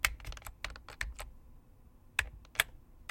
slow typing 1-2
typing, slowly